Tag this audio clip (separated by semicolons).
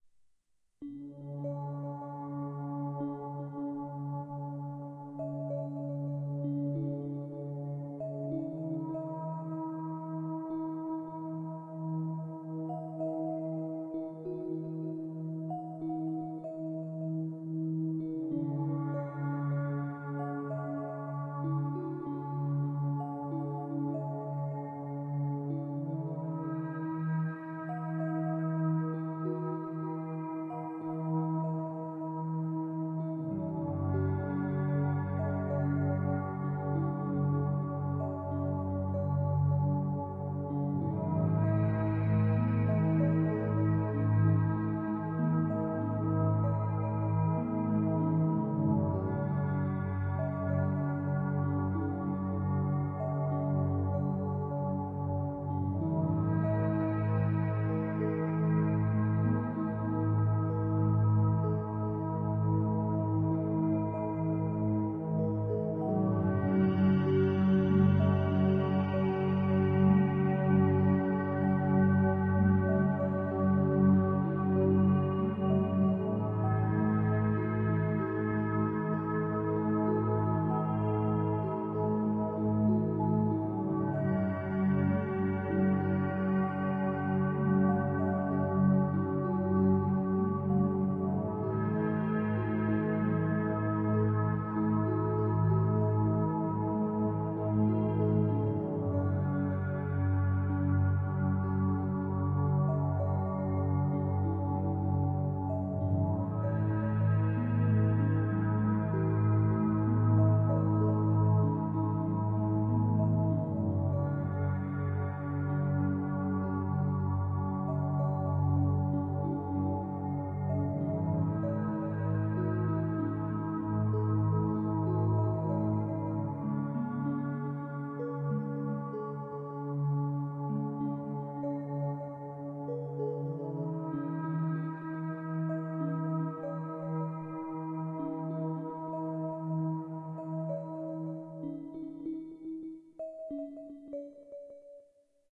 meditation; ambient; building; relaxing; meditative; trickling; relaxation; calm